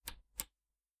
Button Click 01
The click of a small button being pressed and released.
The button belongs to a tape cassette player.
Machine, Casette, Player, Tape, Click, Button, Release, Press